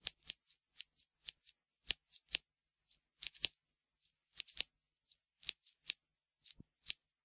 Me running with scissors, something which you should never ever do or you might accidentally cut open the universe.